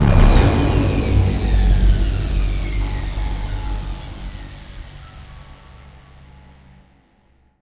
ufo-explosion-3
Sounds used in the game "Unknown Invaders".
gun, game, space, ship, alien, galaxy, ufo